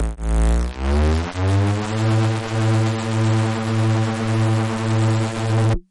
DNB Bass synth played at Key A.
Drum, Bass, DNB, Synth